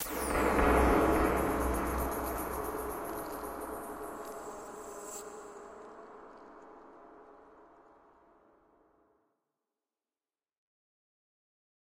Alien Icewind 3
Tweaked percussion and cymbal sounds combined with synths and effects.
Air, Alien, Ambience, Artificial, Deep, Effect, Gas, Machine, Noise, SFX, Sound, Wind